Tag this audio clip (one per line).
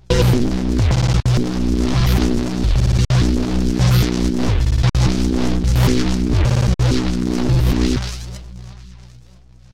Abstract
Design
Effects
Electric
Glitch
Random
Sci-fi
Sound
Sound-Design
Weird